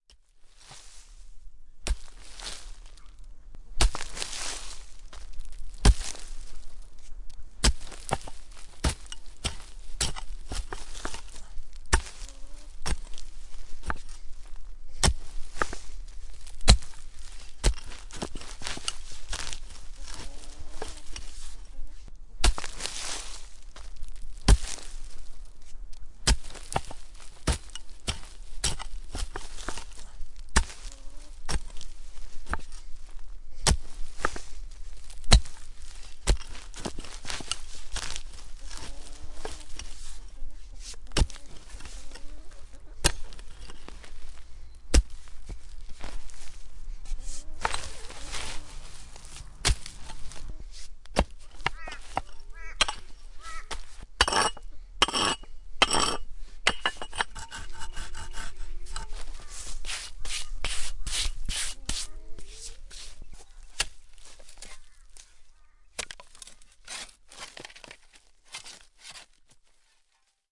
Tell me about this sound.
the sound of the garden being dug with a mattock. nice and chunky. can serve as an sfx in many digging scenarios.